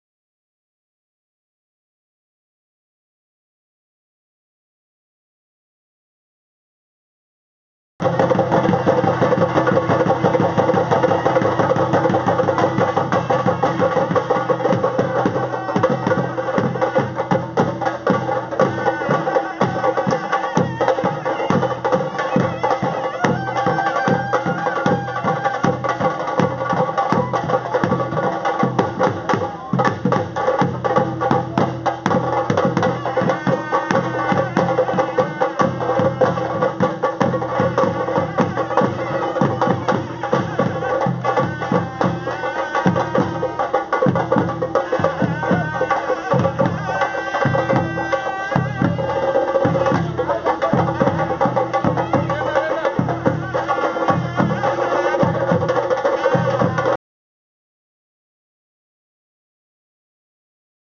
7 theyam dancer goes back to shrine
Fire dancer returns to the shrine courtyard to commune with the villagers